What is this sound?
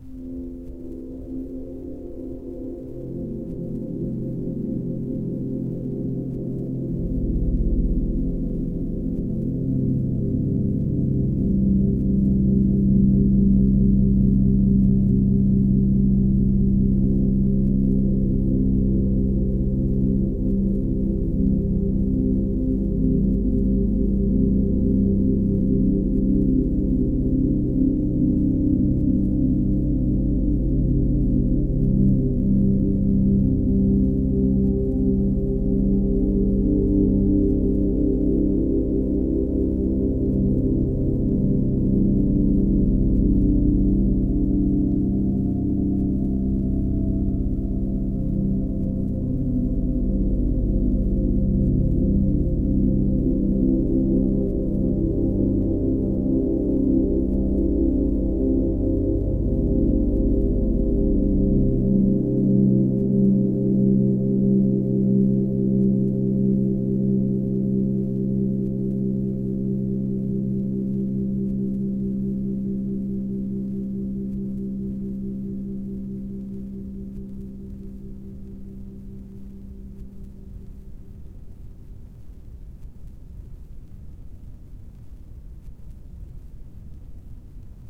A long darkish ambiance.
sad, noise, happy, ambiance, limbo, dark, ambient